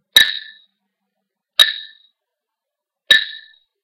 This is a glass hit by a spoon three times.
I add a filter FFT effect on it.
tinkling, glass